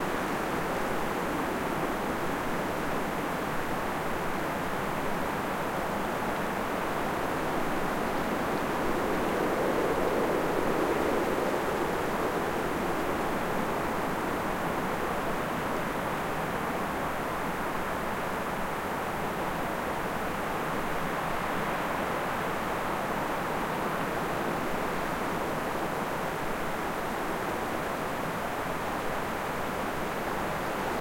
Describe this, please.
A light wind recorded in near a she-oak in Woodina South Australia. I've boosted the volume considerably. I could be mixed nicely into a more complex atmos. Hope you like it.
atmos, atmosphere, field-recording, Wind